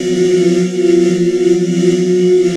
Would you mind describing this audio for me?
Multisamples made from the spooky living dead grain sound. Pitch indicated in filer name may be wrong... cool edit was giving wacky readings... estimated as best I could, some are snipped perfect for looping some are not.